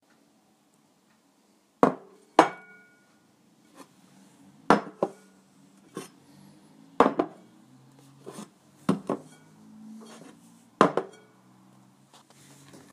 put to table a dish
putting to table a dish. a hard table
put, table